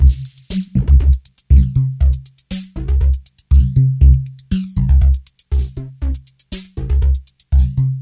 MR Phasy
Lofi recording, analog Yamaha MR10 Drum Machine raw beat. 80's classic drum machine.
Analog, Classic, Drum-Machine, Lofi, Phaser, Yamaha-MR10